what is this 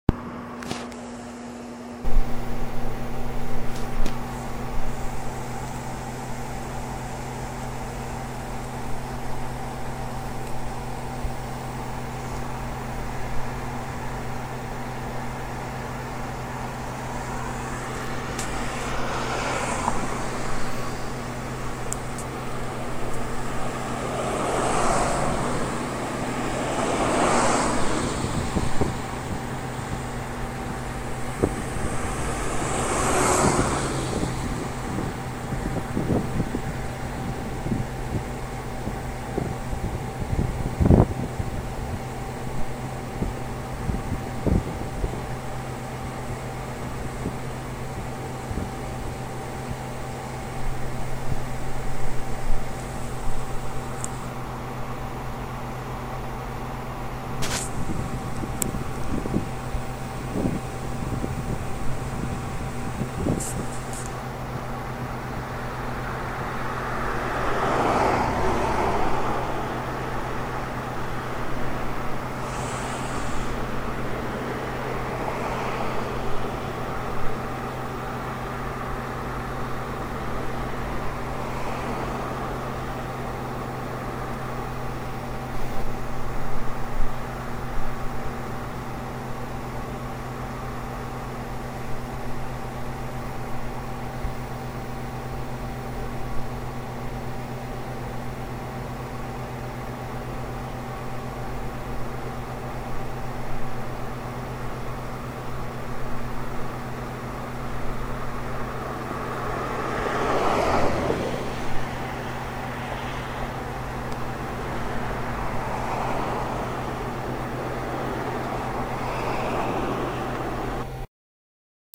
Centennial Pool Rainier Police Stn
Side street near police station
Street, Hum, Traffic